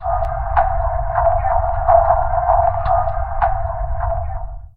A very peculiar sound, again made by looping a sample I took out of a separate recording at the airport. You can here a tune, I think it's someone's cell phone ringing...